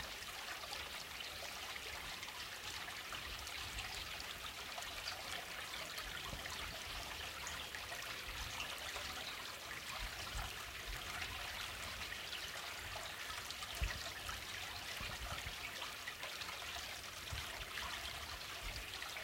Stream in a forest 3
Small stream recorded near a lake in my local forest.
Hope you find it useful. If you like the sounds check my music on streaming services too (search for Tomasz Kucza).
creek, river, relaxing, gurgle, brook, stream, flow, water, liquid, ambient